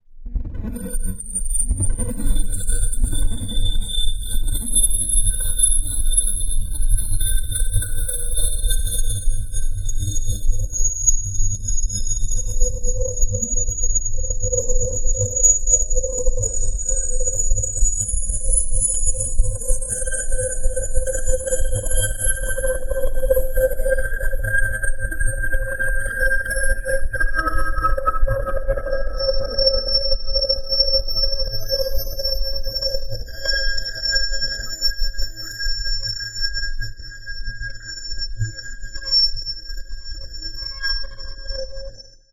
tonal drone with frequencies above the hearing range
this was created with reaktor 6, nested inside vcvrack (via the "host" module) run through various effects in vcv rack. i recommend that, in your daw of choice: pitch this down a couple octaves while running this through a frequency analyzer. watch how many notes and tones emerge into the range of hearing. you might need a high shelf eq on this as well as a slight high pass filter.
several use cases for this sound: in the background of a sci-fi video game. on your next ambient dark drone top 40 hit single. run through effects like granulators, pitch shifters and spectral distortions to make a crazy sound design sculpture.
drone, tones, experimental, vcvrack, ambient, soundscape, high-resolution, supersonic, hd, reaktor